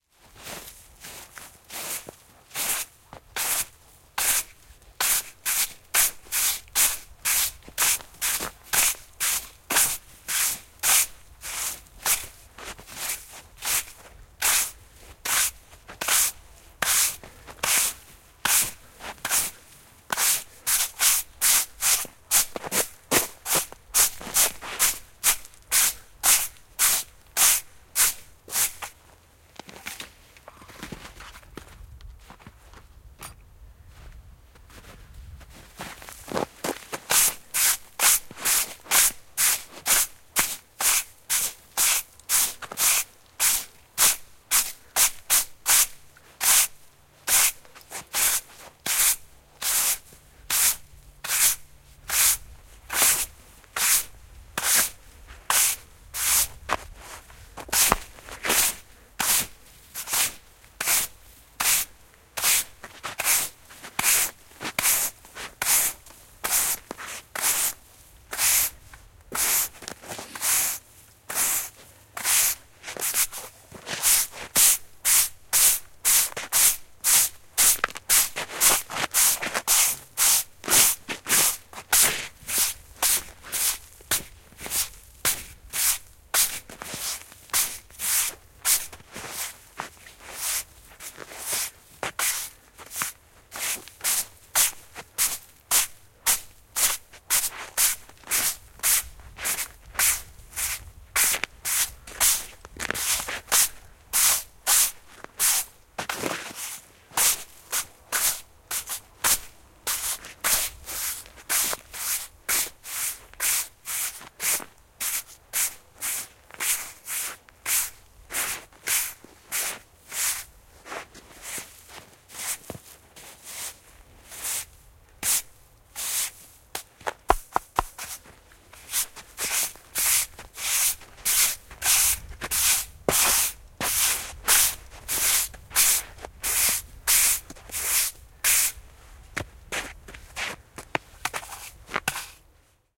Harja, lunta harjataan pihapolulta / Brush, snow is brushed from the yard
Lunta harjataan pois polulta, välillä askeleita.
A man brushing snow from the path in the yard, also some footsteps.
Paikka/Place: Suomi / Finland / Vihti / Haapakylä
Aika/Date: 01.03.1984
Field-Recording, Finnish-Broadcasting-Company, Lumi, Snow, Soundfx, Suomi, Tehosteet, Winter